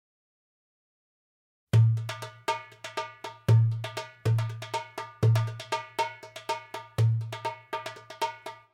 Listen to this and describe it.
03.Kalamatianos variations
This is a widely spread Greek rhythm and dance. Most commonly notated as a 7/8 rhythm. The name originates from an area in Peloponisos. This recording contains some variations.
Musician: Kostas Kalantzis.